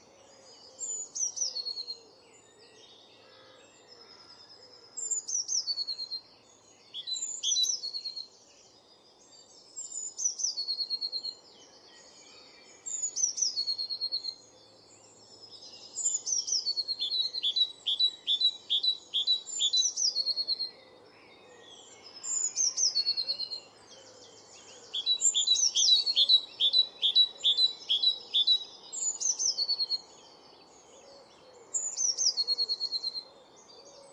Blue Tit and Great Tit
Blue tit (Cyanistes caeruleus) and great tit (Parus major) recorded in woodland in Essex, UK. Equipment used was Sennheiser K6/ME66 attached to a Zoom H5. Some editing with Audacity to remove unwanted noise, though there has been no noise reduction to the recording itself. The recording was made on the morning of 3rd May 2018.
bird, birdsong, field-recording, nature, woodland